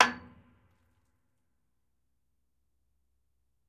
Tank of fuel oil, recorded in a castle basement in the north of france by PCM D100 Sony